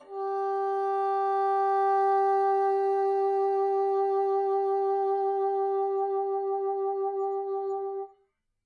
One-shot from Versilian Studios Chamber Orchestra 2: Community Edition sampling project.
Instrument family: Woodwinds
Instrument: Bassoon
Articulation: vibrato sustain
Note: F#4
Midi note: 67
Midi velocity (center): 31
Microphone: 2x Rode NT1-A
Performer: P. Sauter
fsharp4, multisample, vibrato-sustain, vsco-2, woodwinds, midi-velocity-31, single-note, midi-note-67, bassoon